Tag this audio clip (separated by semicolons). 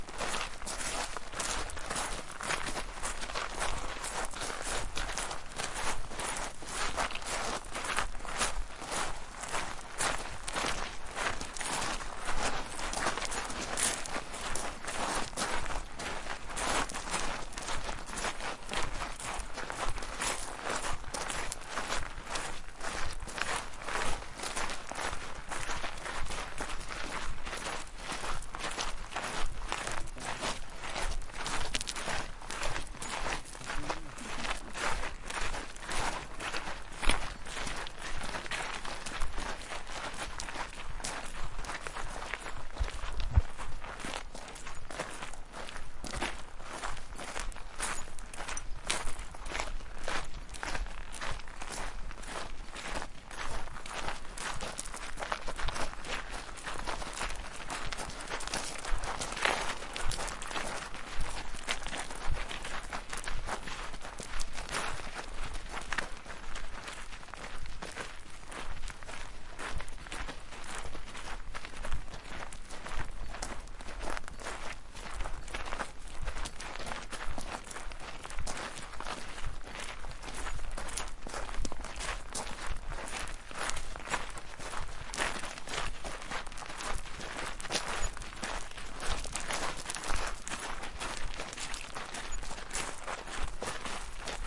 walk; gravel; people; path; steps; stones; walking